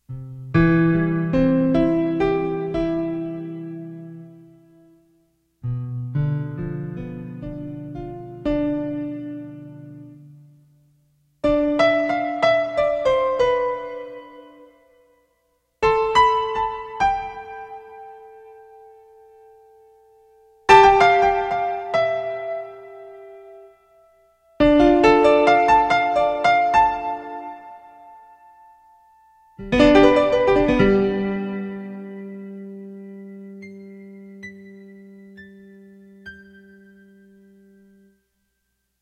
Me testing the plugins on the input channel signal. I am doodling on my Alesis through a UB802 mixer and a reverb VST. I wanted to see how dynamics affected output signal.